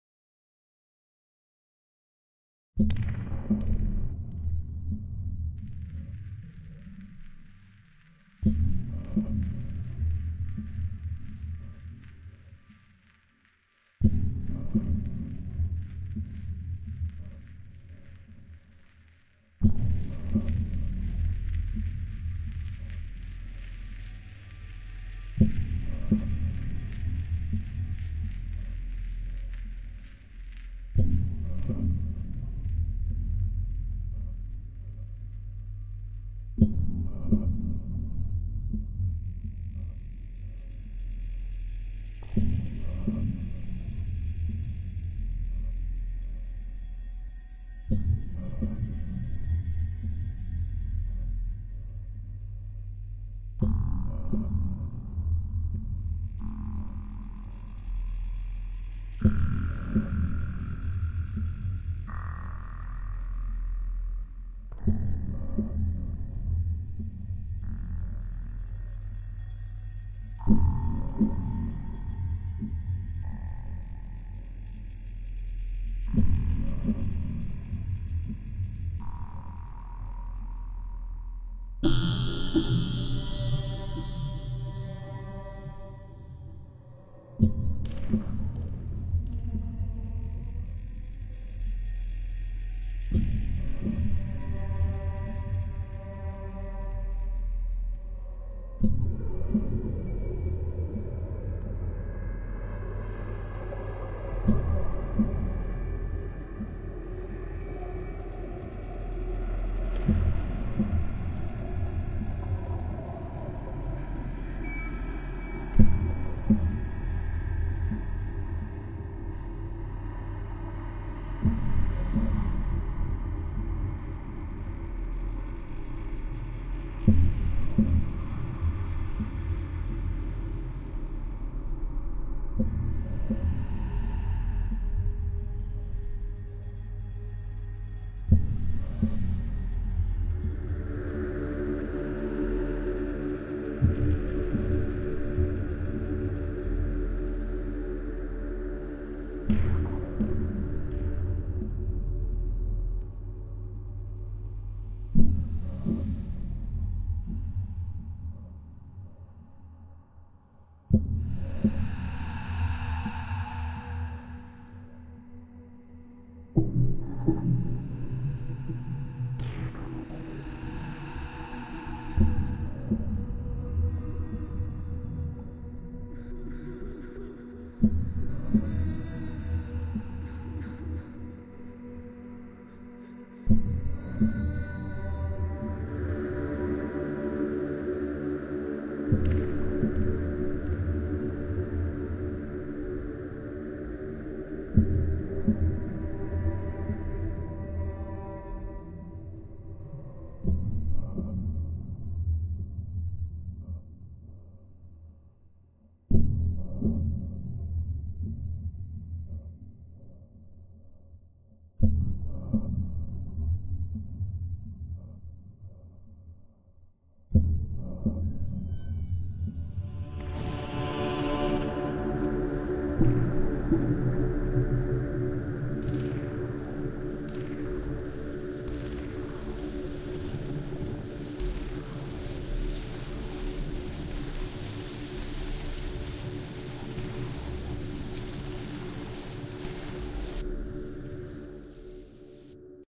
Cold Night Alone copy
This is a Dark Ambient I came up with for a side project. My bandmate in Vomithrax ask me to come up with something for the the name "Cold Night Alone" and this is the 2nd version I came up with. Hope you get a chill or a uneasy feeling from this'n..wear yer best headphones and turn it up. thanks j'all!!
Ambience, Night, Khold, Blacken, Dark, Diseased, Unholy, Cold, Kold, Scarey